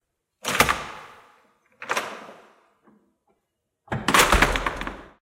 Heavy Door Open Close
Open and close metal door with a push bar like you would find in a public school or public building.
public, metal, open, Loud, door, school, industrial